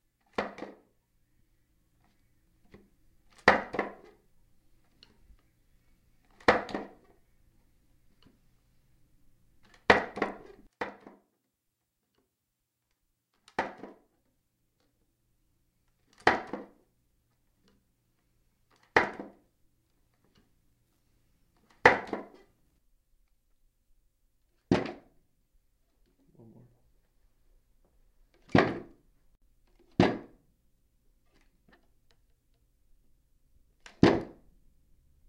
Chair Falling
A wooden table chair being moved and dropped from varying heights and angles.
over, wood, falling, knocked, chair